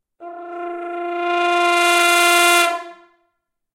A fluttertongued F4 on the horn. Recorded with a Zoom h4n placed about a metre behind the bell.